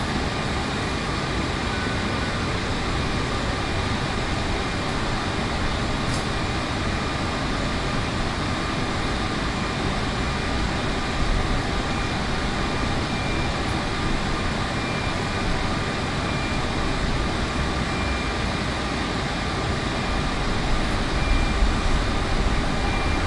machinery close to home
industrial, machinery, sound-effect